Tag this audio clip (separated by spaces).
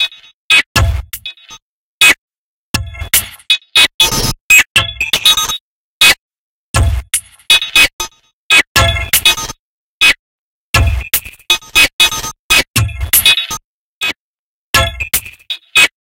distorted loop percussion